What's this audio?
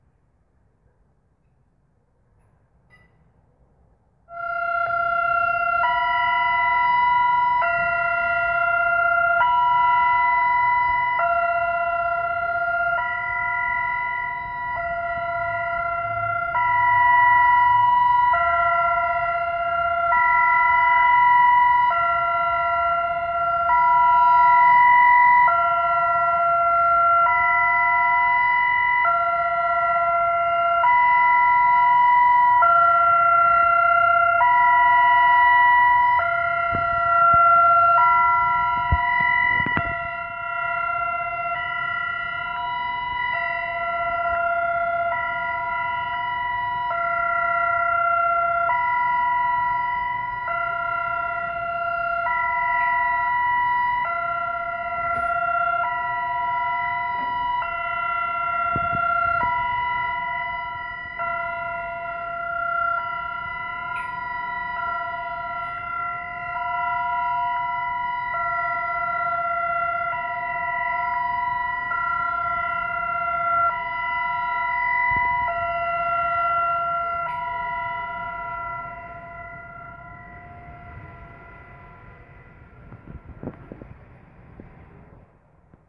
BombTest Siren 1
Air-raid siren test. The sound of the actual siren itself.
There's a perfect delay as the sound bounces around the city creating a strong reverb effect.
air-raid, warning